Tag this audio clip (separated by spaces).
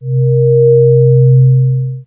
swell synthesis bass additive multisample metallic